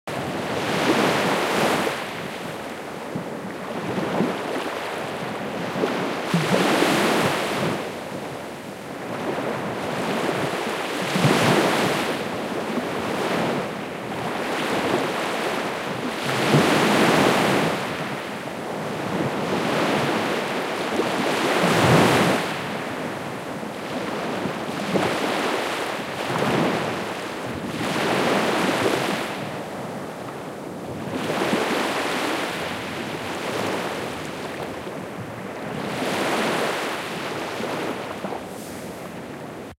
Ocean Waves by the Baltic Sea (Stubbenkammer).
Recorded with a Zoom H4n.
Thank you for using my sound!